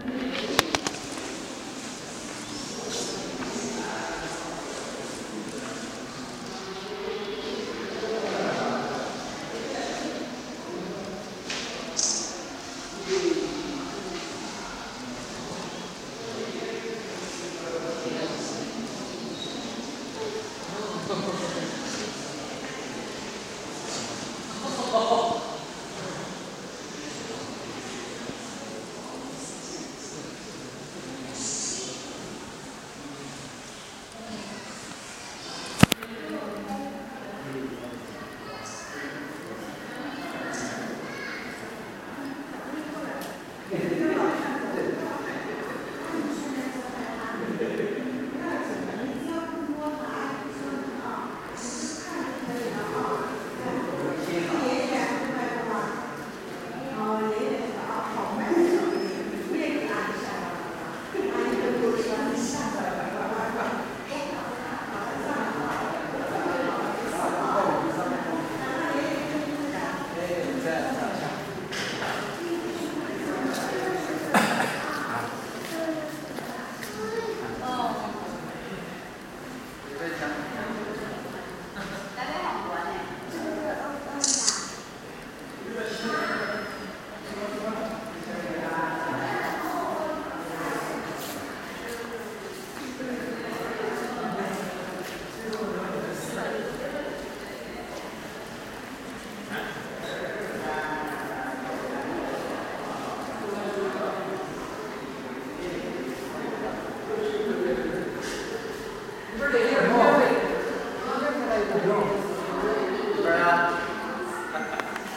Recorded at a modern art exhibition with a Canon D550.